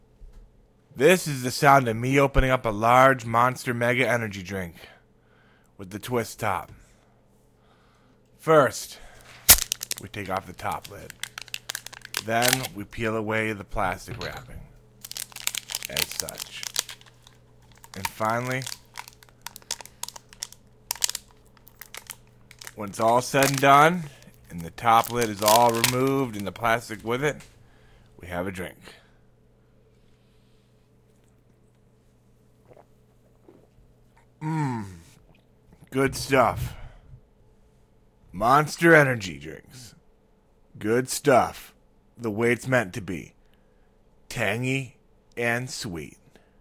Pouring a Monster Mega Energy Drink
This is me narrating a sound of a Monster Mega Energy Drink being opened, with a twist top and plastic wrap. Recorded with a Yeti Stereo microphone, by Blue Microphones.
Monster, Drink, Narration, Monster-Mega, Opening, Energy, Drinking